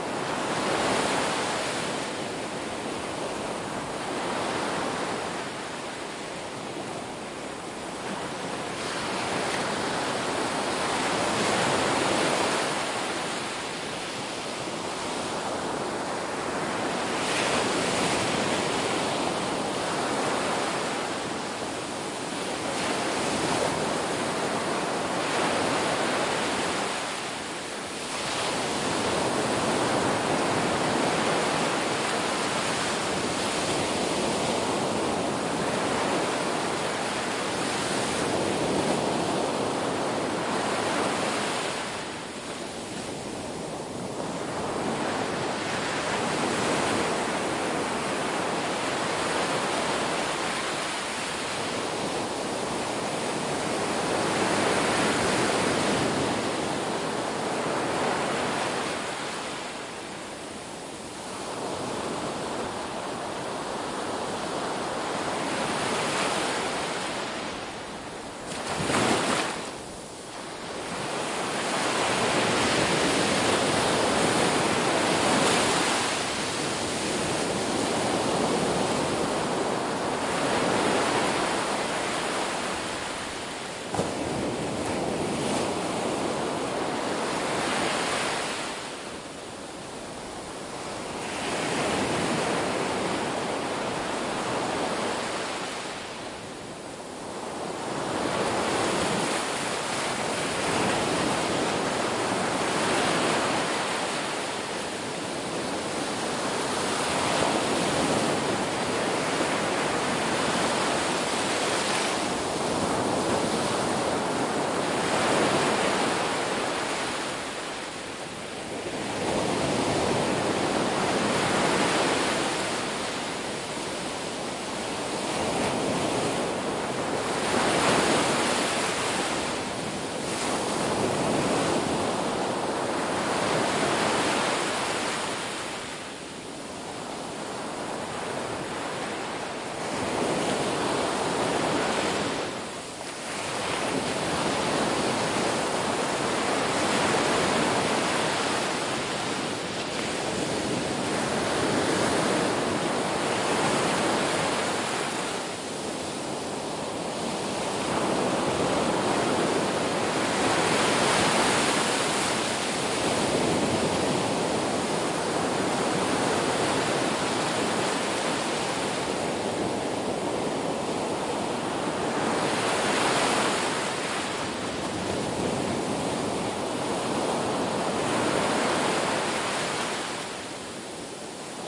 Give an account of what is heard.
Beach Waves Close
Sounds from St. Gorge Island I recorded in Florida with my Tascam DR40.
Have fun, and if you make anything share a link so I can see it!
coast, breaking-waves, wave, tide, shoreline, sea, seaside, nature, beach, seashore, shore, rocks, waves, splash, sea-shore, lapping, ocean, field-recording, surf, water